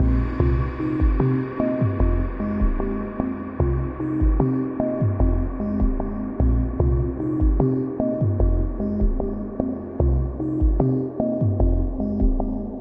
075 fm Ellberge Full
Another loop made with the modular
bassy, processed, 075bpm, endlessly, hypnotic, modular, electronic